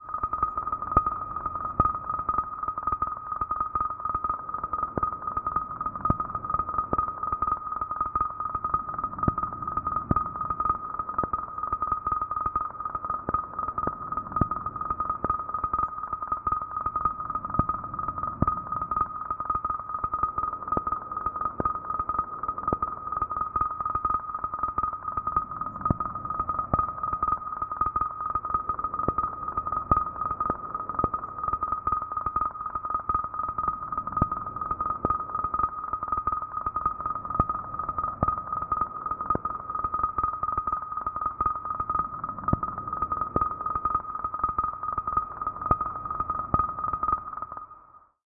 Strange texture made in zebra2.